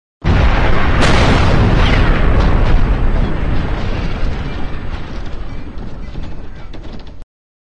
BANG!!!
This was from a test car explosion...
bang, booom